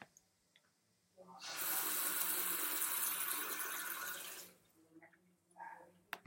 water tap
ba, bathroom, llave, tap, turn